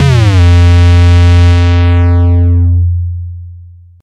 Jungle Bass Hit F1
Jungle Bass [Instrument]